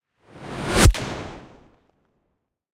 whoosh into hit 003
Designed whoosh into impact